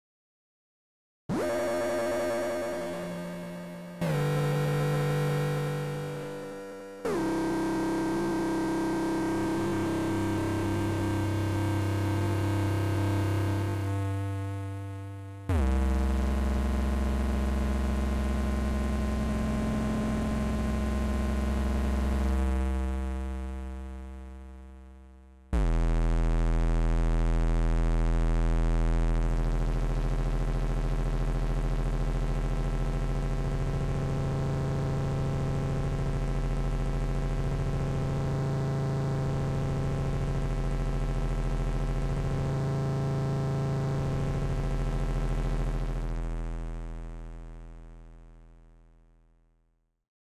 Digital Noise Feedback
A digital noise sound. Synthesized using a free vsti instrument. Can be used for horror/sci-fi movies and other media.
digital, effect, sound, sci-fi, synthesizer, synthetic, noise, feedback